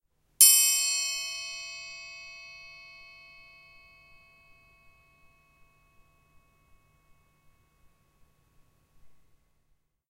Triangle, 8'', Hard Hit, A
Raw audio of a single hit on a "8 inch (20cm) triangle" using a hard metal beater.
An example of how you might credit is by putting this in the description/credits:
The sound was recorded using a "H1 Zoom V2 recorder" on 17th September 2016.
Inch, Metal, Hard, Inches, Triangle, Beater, Hit, 8, Percussion